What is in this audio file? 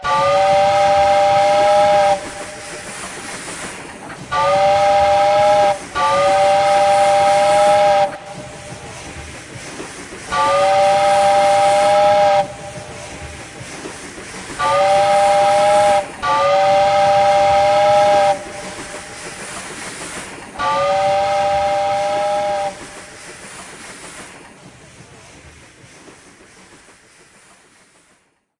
train whistle and chuga chug
town, train